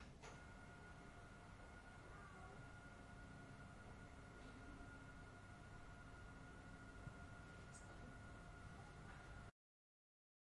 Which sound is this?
25112014 rollerblind H2nextMS
Sound Description: Hell oder dunkel? Die Jalousien werden automatisch betrieben
Recording Device: Zoom H2next with xy-capsule
Location: Universität zu Köln, Humanwissenschaftliche Fakultät, Gebäude 906
Lat: 6.920556
Lon: 50.935
Date Recorded: 2014-11-25
Recorded by: Selina Weidenfeld and edited by: René Müller
University, machine, Cologne, Field-Recording